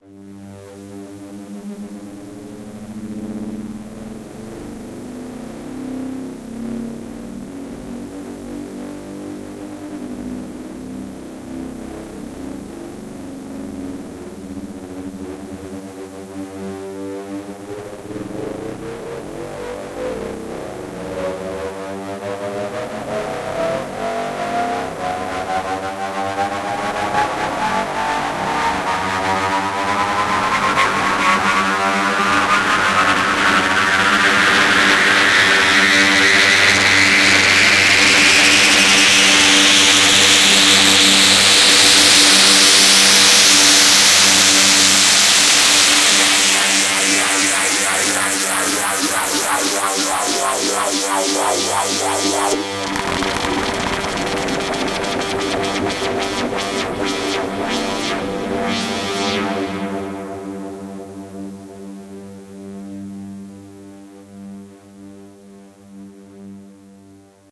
sd-128bpm-G-FmNoiseFx1
This is a fm fx sweep sound from the access virus ti synth.
recording is done through the access virus ti usb interface with ableton live sequencer software.
effect, fm, fx, modulation, sweep, synth